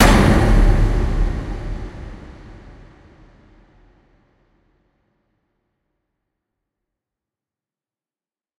This is a recreation of the Terminator 2 theme ending. The slam when the truck grill is shown on screen.